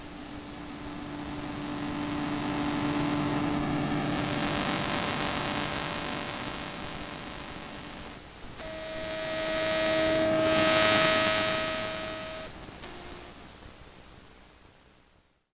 Phone transducer suction cup thing stuck to the glass of my scanner/printer as light bar passes and returns to home.
hum, buzz, electricity, transducer, electro, magnetic